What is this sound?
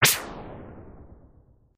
FX whip with reverb and short delay. It's a completely artificial sound made in Adobe Audition.
fx-whip, whip